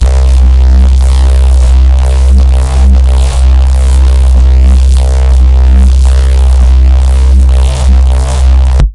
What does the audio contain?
ABRSV RCS 036
Driven reece bass, recorded in C, cycled (with loop points)
bass, driven, drum-n-bass, harsh, heavy, reece